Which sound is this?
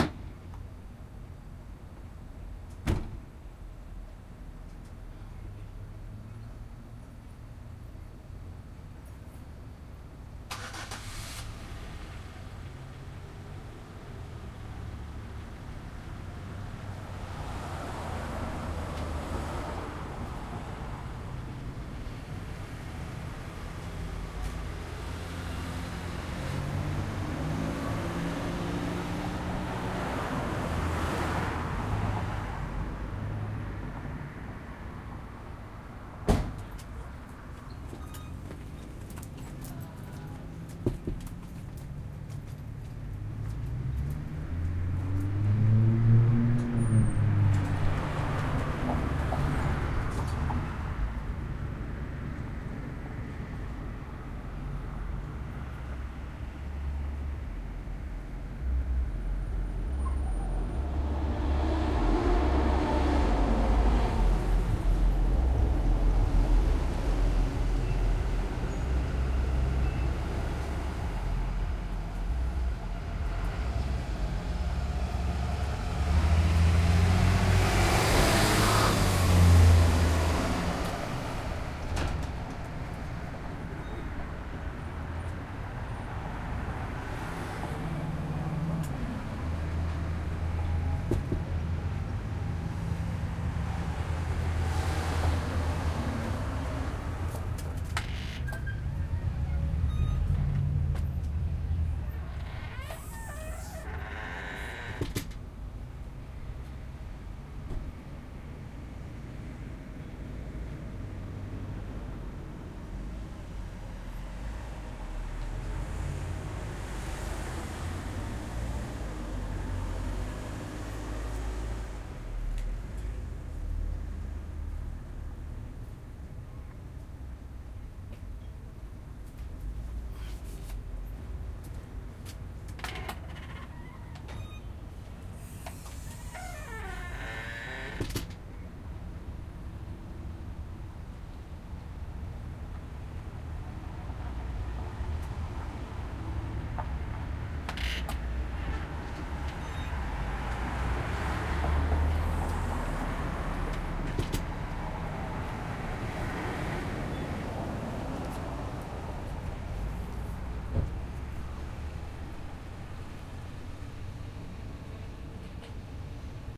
The sound of a bunch of cars and trucks driving by on a busy street. A door opens periodically nearby. Extremely loopable. (Sorry about that, by the way. I had to disguise it with a car-door opening. you can work on that if you want to.)
field-recording, automobiles, horseless-carridges, loop, cars, trucks, noise